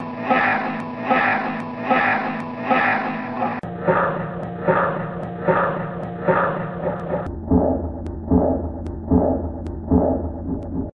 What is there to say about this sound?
A single YEAH repeated, stretched and pitch shifted in cubase.Enjoy !!!
repetiton, speak, word, scream, shift, vocal, pitch